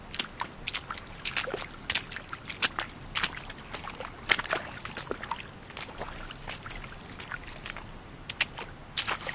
splashing puddle of water
splashing, water